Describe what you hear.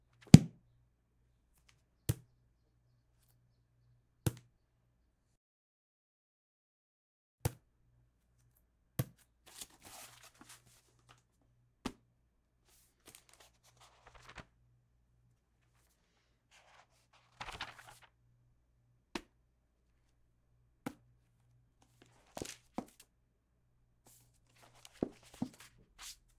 Book Manipulations - Page turns, open, close
This is a close mono recording of the manipulation of a large bible. Opening and closing, thumping the cover, etc. Enjoy!
bible, book, cover, flick, flip, hit, page, pages, thump, turn